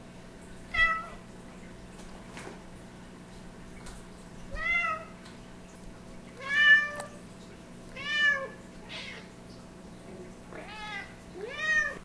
Waiting for food, they annoy me audibly.
meow, chino, mocha, cats